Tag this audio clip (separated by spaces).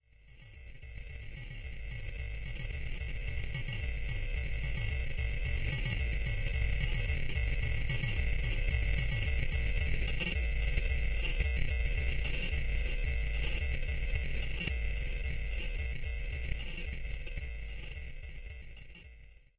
simple beat weird surreal loop odd rythm cute experimental cowbell little funny freaky breakbeat claves peculiar eccentric wtf